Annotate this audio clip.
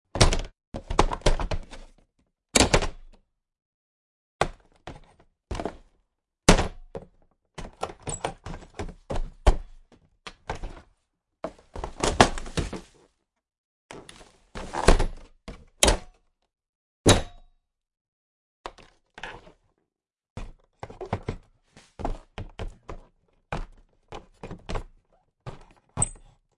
school bus truck int roof hatch mess with
truck, bus, hatch, school, roof, int